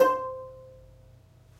Notes from ukulele recorded in the shower far-miced from the other side of the bathroom with Sony-PCMD50. See my other sample packs for the close-mic version. The intention is to mix and match the two as you see fit. Note that these were separate recordings and will not entirely match.
These files are left raw and real. Watch out for a resonance around 300-330hz.